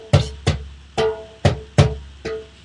These are all samples I created with my drums. They have been heavily modified in Audacity.
audacity, drum, percussion, loops